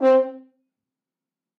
One-shot from Versilian Studios Chamber Orchestra 2: Community Edition sampling project.
Instrument family: Brass
Instrument: F Horn
Articulation: staccato
Note: C4
Midi note: 60
Midi velocity (center): 42063
Microphone: 2x Rode NT1-A spaced pair, 1 AT Pro 37 overhead, 1 sE2200aII close
Performer: M. Oprean